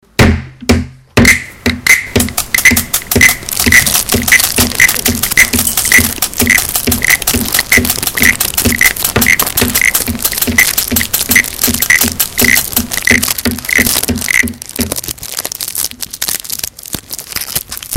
TCR soundscape MFR cyrielle-tadeg
French students from La Roche des Gr&es; school, Messac used MySounds to create this composition.